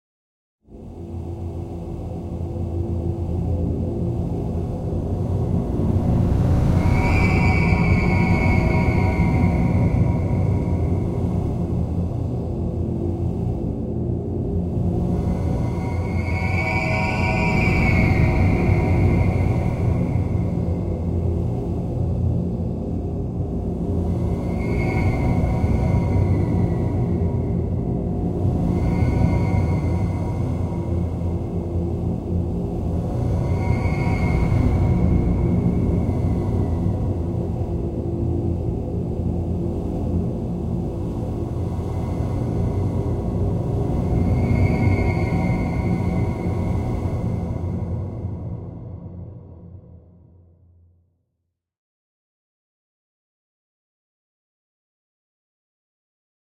Walk in Dark Wind
Sheet music based on spooky and dark tones. From these came the ambient sound installation vision.
SFX conversion Edited: Adobe + FXs + Mastered
Music